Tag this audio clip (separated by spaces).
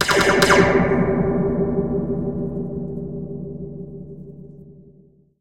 space alien sci-fi laser power